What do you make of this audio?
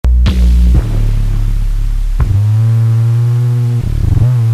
res out 05
In the pack increasing sequence number corresponds to increasing overall feedback gain.
computer-generated, feedback-system, neural-oscillator, automaton, chaos, synth